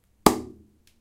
This sound is part of the sound creation that has to be done in the subject Sound Creation Lab in Pompeu Fabra university. It consists on a man opening the pot of the shaving foam.
pot, UPF-CS14, uncover